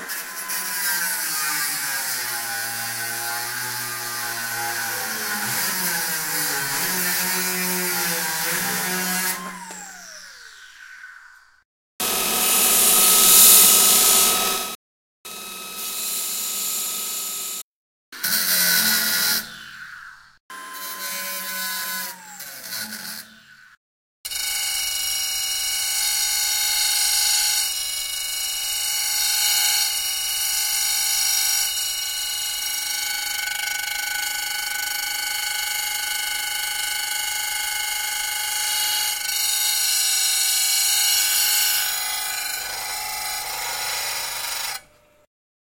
Grinding steel
Using an air-powered metal grinder on metal. Recorded using the onboard mics of the Roland r26
air, metal, pressurised, tools